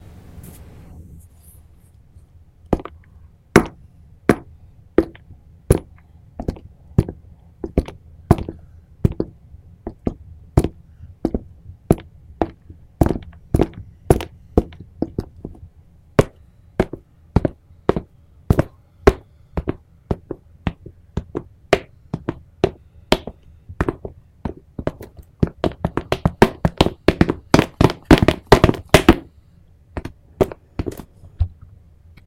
Foot Steps
Walked on the ground with a boot that has a metal zipper you can kind of hear. Recorded with my ZOOM H2N.
footstep, step, walk